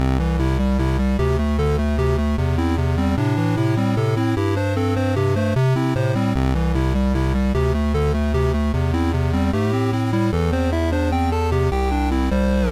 Test Loop 02
short test loop
Thank you for the effort.